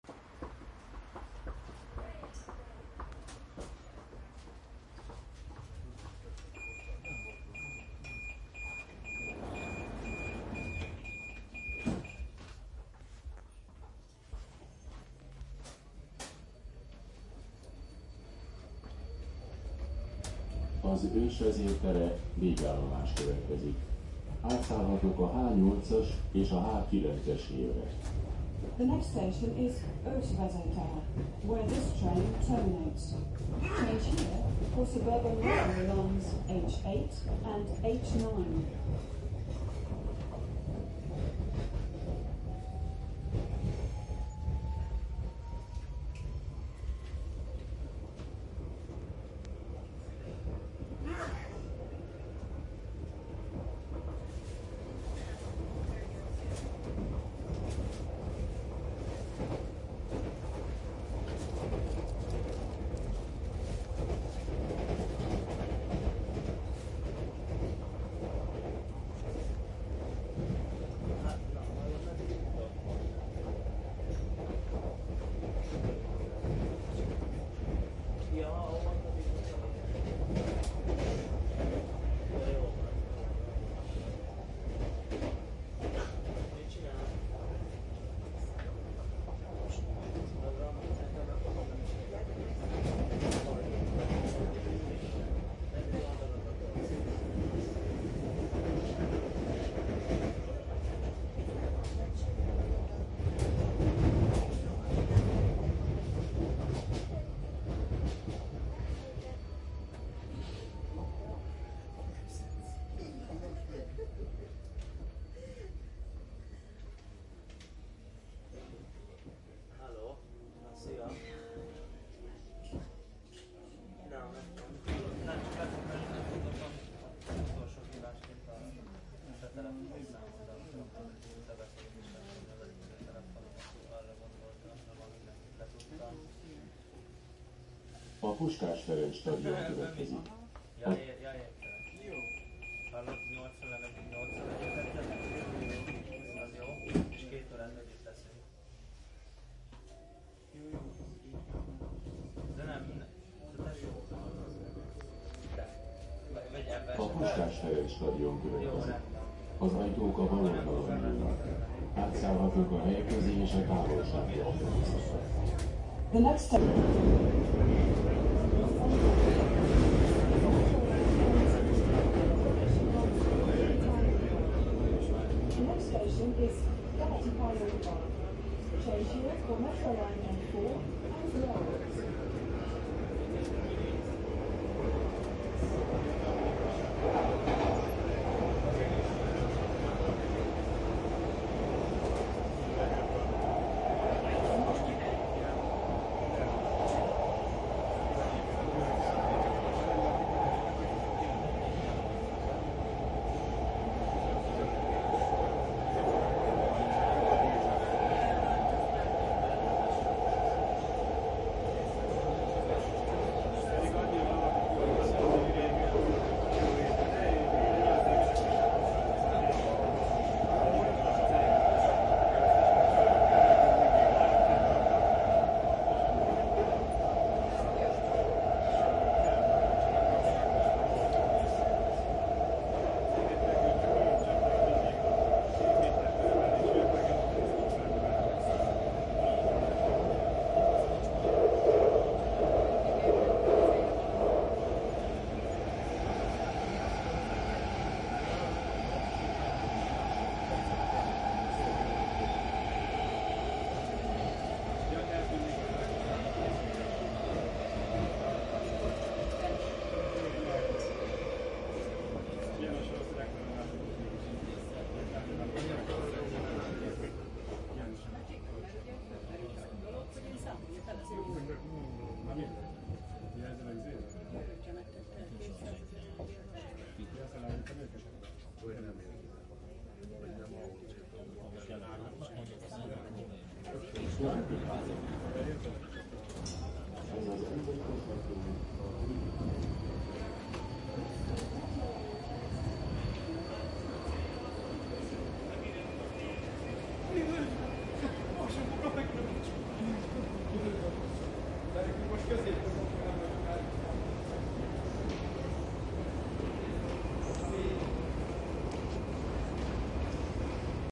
M2 Subway Budapest
Budapest Subway M4